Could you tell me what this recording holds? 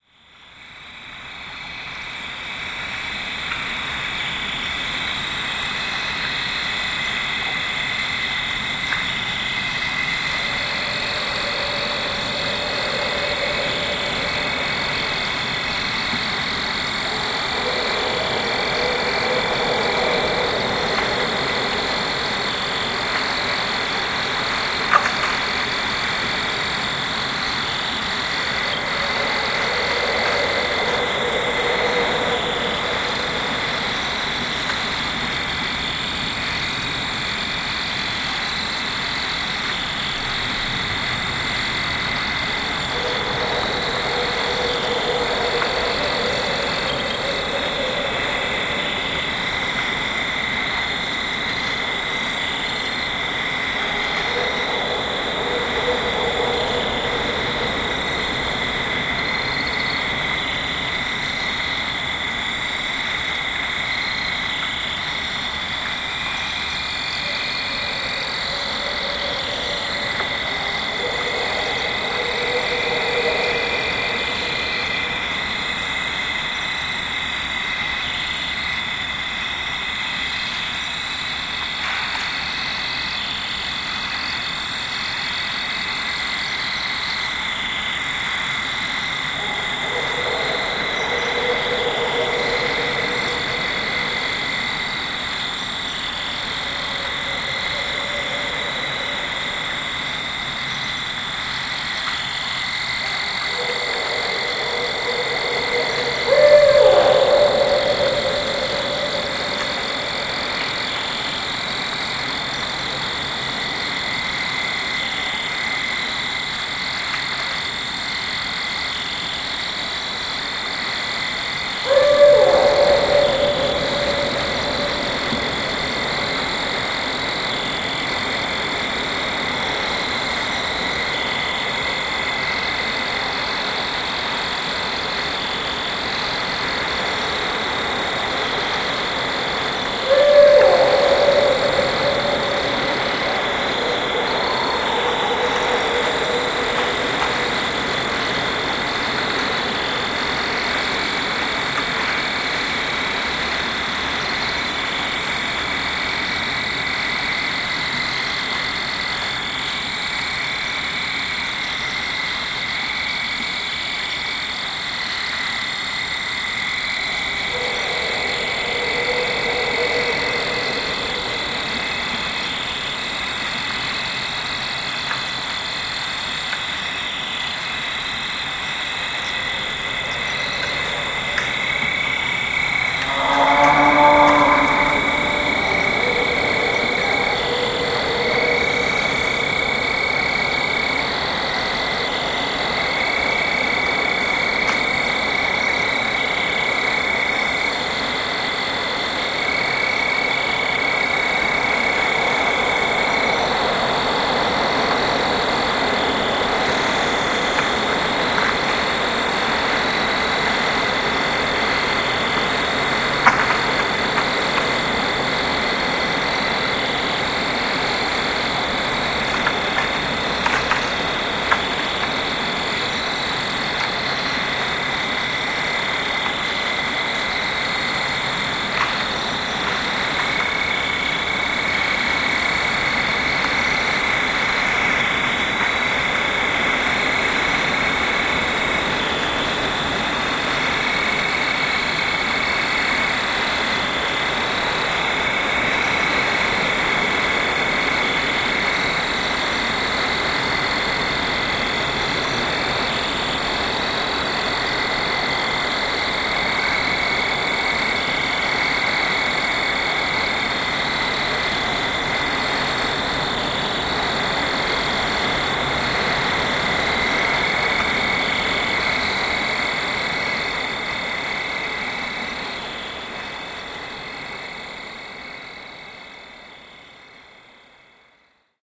Barred owls, cow, wind, & fall field crickets (Neches riverbottom)
Recorded on Nov. 21, 2013 in the river-bottom of the Neches River (12am-4am). Used 2 NT1A mics and a Tascam DR-680 with Busman mod. Cardioid mics were spaced at 21cm with a 120-degree angle. Recorder channels were both set to high gain, which brought in a whole lot of extra detail.
The Story
My original goal was to record fall field crickets that night. I got that, plus a bunch of extra sounds I didn't expect. You'll hear Barred owls calling (the most talkative of all owl species) - I think there were 3 (1 up close and 2 far off). If it sounds like a howler monkey in the far-off distance, that's one of the calls the Barred owl makes. That's at about -2:13 or +2:23. It's hard to tell what it is at first. If you've ever heard this type of owl, then you'll notice 2 different ones. Their sounds overlap - one is hooting and one sounds like a howler monkey. And yes, you'll also hear a cow bellowing at -1:35 or +3:33. Not real sure where it came from - no fields, pastures, or farmland nearby.